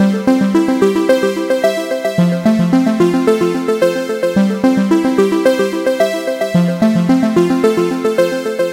A member of the Alpha loopset, consisting of a set of complementary synth loops. It is:
* In the key of C major, following the chord progression C-F-C-F.
110bpm, synth